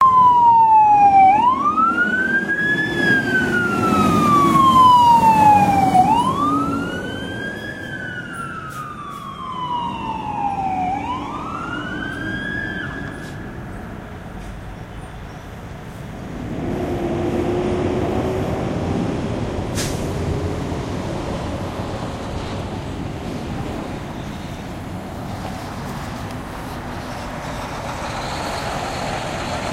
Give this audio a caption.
Fire Truck Passing
This is another stereo recording of an emergency vehicle passing. This time it's a fire truck. Enjoy and download.